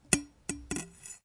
Recorded knifes blades sound.
glitch, blade, switch, sound, knife, recording, blades-sound, field-recording, glick, vibration, high